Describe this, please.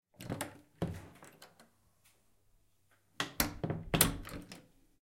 Door Open Close Interior2
Recorded with a Zoom H4N in a Small House. An Interior Door Opening and Closing. Stereo Recording
room, gentle, open, close, stereo, interior, door